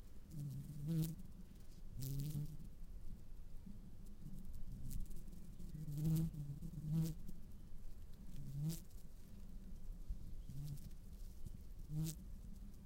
The bee was on the grass struggling to lift off so it made it easier for close recording.